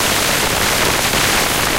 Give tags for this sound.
sound; weird; electric; sound-design; loop; lo-fi; sounddesign; abstract; soundeffect; digital; strange; effect; noise; freaky; sci-fi; future; electronic; fx; sfx; glitch; machine

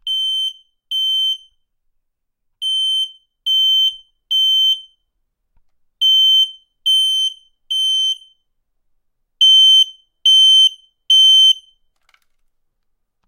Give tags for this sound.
beeping; digital; electronic; alarm

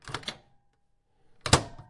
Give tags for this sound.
microwave; close

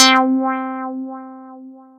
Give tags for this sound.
pluck; wahwah

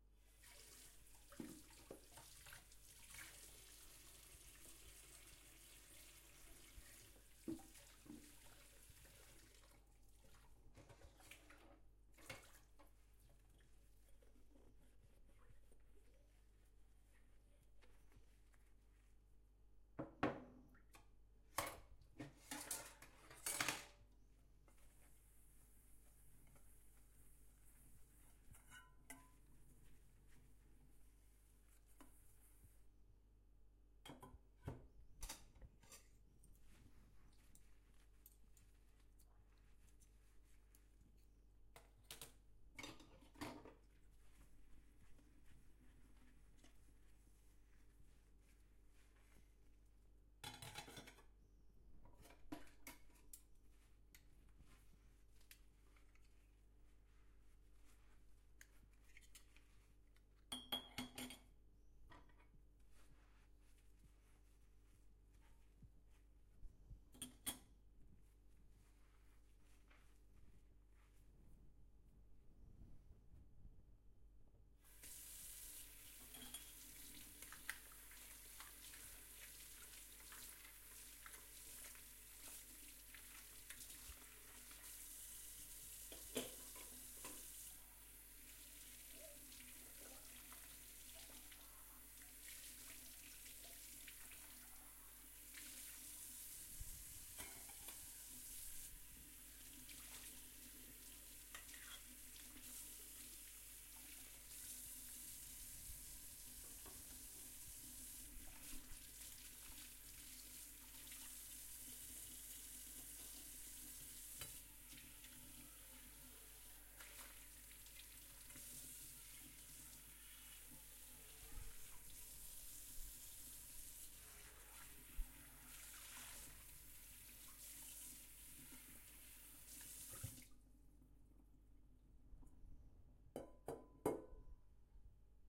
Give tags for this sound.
cleaner; dishes; water; dish; dishwasher

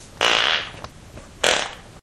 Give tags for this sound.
aliens; car; explosion; fart; flatulation; flatulence; frogs; gas; noise; poot; race; space

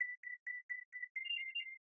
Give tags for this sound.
alarm; alert; danger; emergency; high; security; siren; warning

